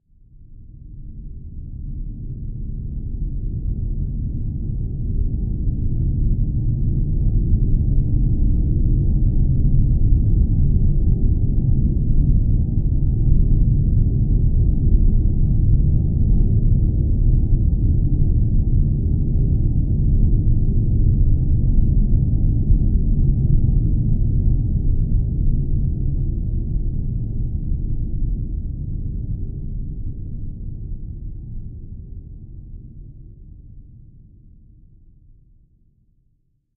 Large Spaceship Flyby 001
Sound effect resembling the passing by of a large object. Created using granular synthesis in Cubase 7.
Ambient, Spaceship